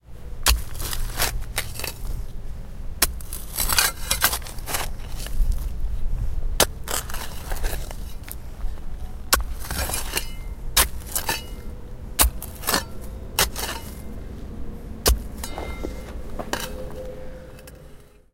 digging with a shovel
Digging a small shovel in the ground. Recorded with a Zoom H1 on a hot day next to the road.
ground, shovel, digging